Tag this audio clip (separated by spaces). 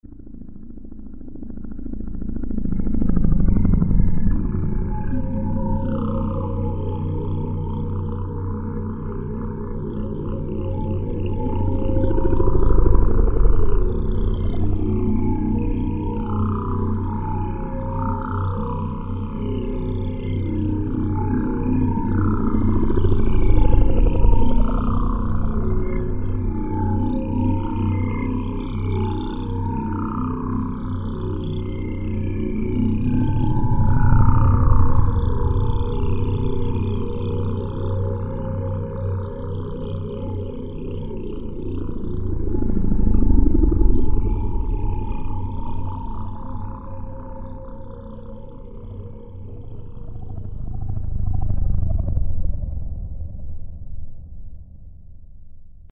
ambient didgeridoo space